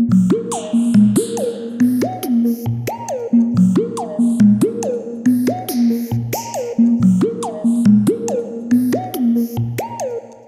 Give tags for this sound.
Sounds cool Game Mind universe Space

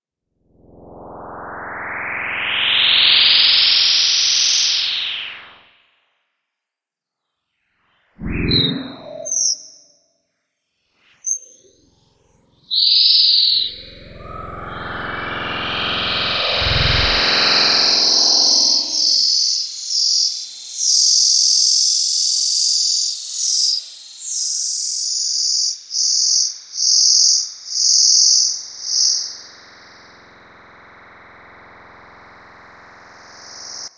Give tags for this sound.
image-to-sound ambiance bitmaps-and-waves

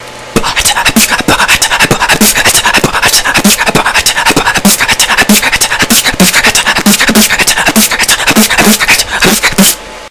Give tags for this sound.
4 beatbox dare-19 generic

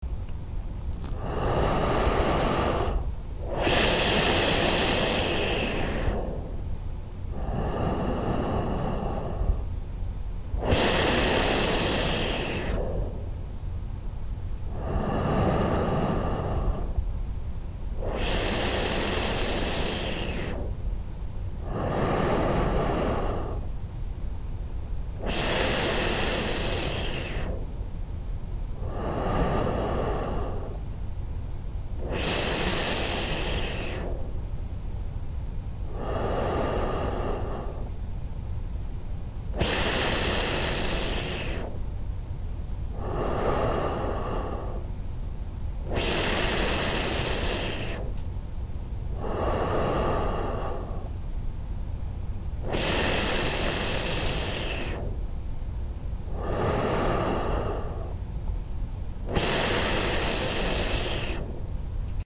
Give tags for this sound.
male human mouth vocal slowly man

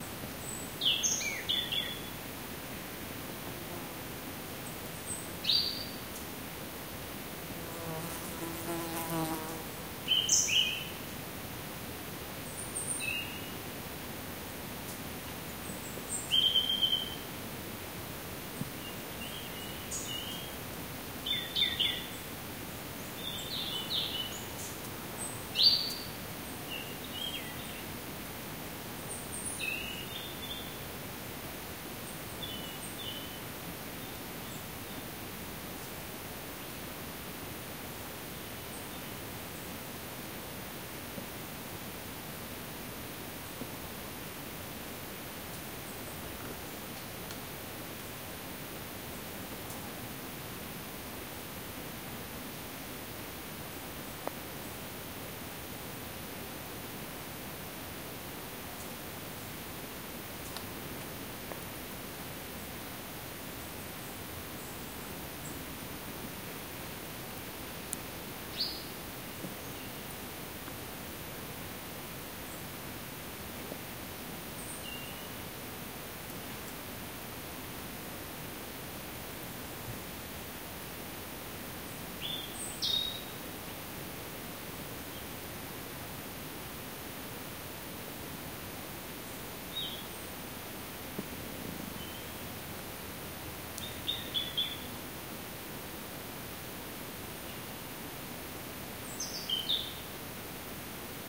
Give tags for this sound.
bird field-recording nature winter

